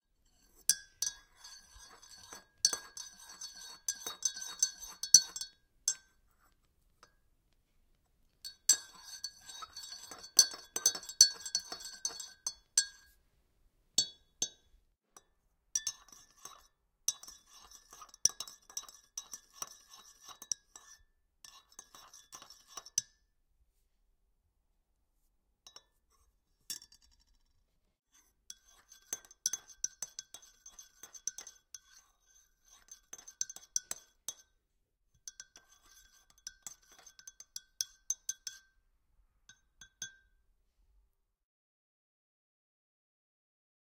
Stirring Cup
Stirring a cup of boiling water.
stirring, hot, stir, mug, cups, cup, spoon, clink, tea, coffee